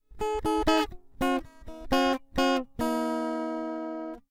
Samples of a (de)tuned guitar project.
stuff, random, guitar